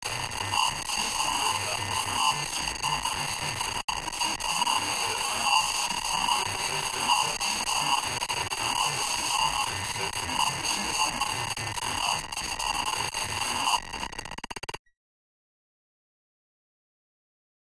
Screw Scream
This sound is used by my vocals and it seems to be crackling sounds using a vst effect by Lofi 8-bits FX
Dark, Cinematic, Zombie, Auto, Scary, Horror, Fire, Battle, Ambient, Weapon, Original, Scream, Animal, Gun, Drone, Film, Monster, Atmosphere, Free, Sound, Spooky, Rifle, Movie, Rattle, Combat